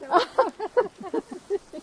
brief woman laughter
laughter, female, field-recording